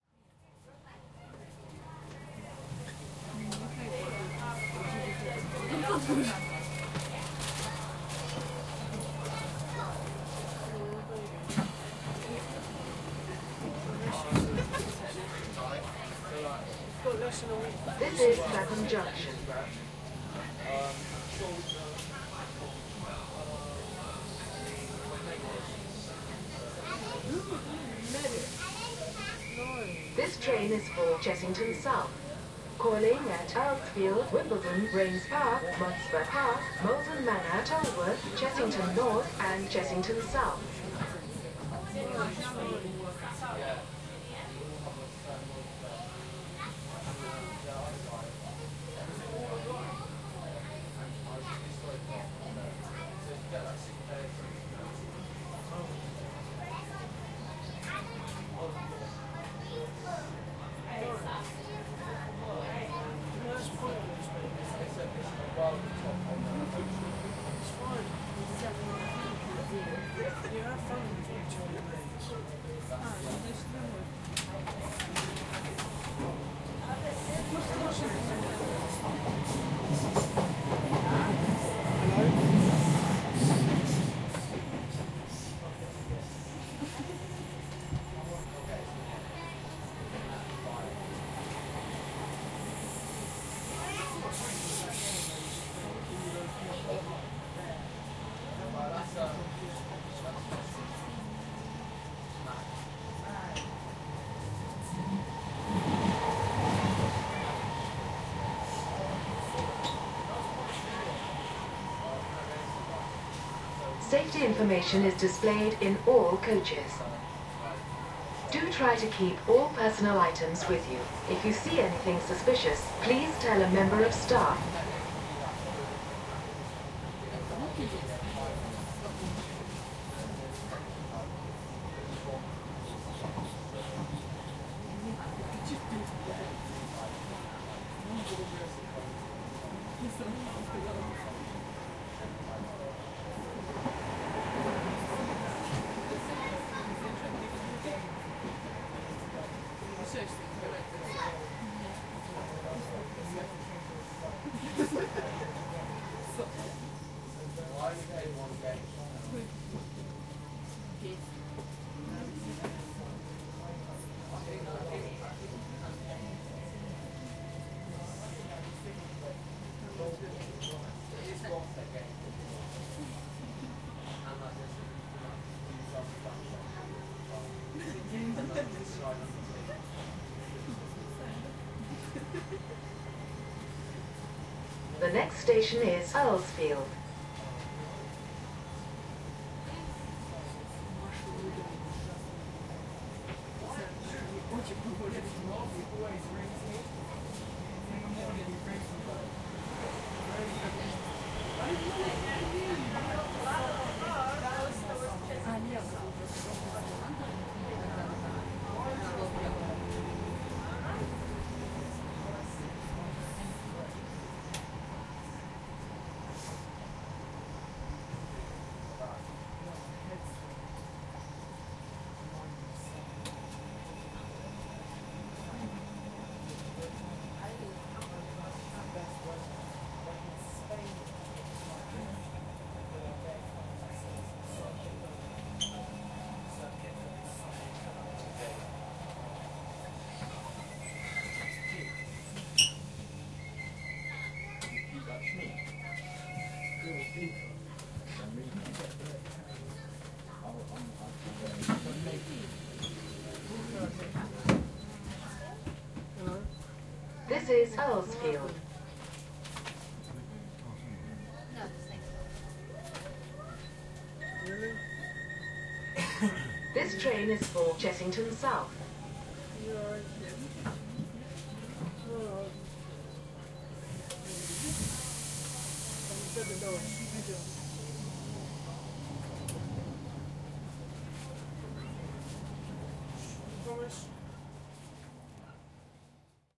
Train Interior Atmosphere
Recorded With Zoom H4N part of a journey by train between Clapham Junction and Earlsfield in London. Atmospheric interior sound including train doors opening and closing and the sound of other trains passing nearby.